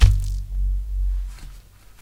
Balloon Bass - Zoom H2